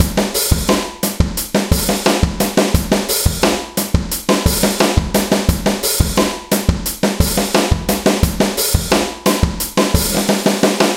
DL BA017 175
Original Drumloop at 175 bpm
acoustic, 175, beat, bpm